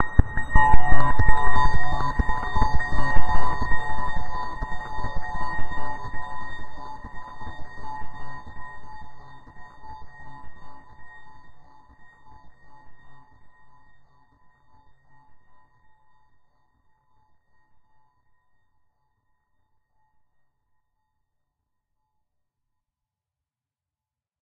Alien Alarm
This is some ambience sound capture from Serum well the default preset that came with. I resample'd it with some reverb and Lo-FI Delay effect bring the octave down making some sound sort of alien like alarm.
Ambience, Attack, Creature, disc, documentary, dream, dreamlike, Dry, Effect, evil, fantasy, Growl, Hit, Horror, monster, Mystery, Original, psychedelic, Roar, Scary, science, Sci-Fi, Sound, soundscapes, spacious, ufo, vibrating, Zombie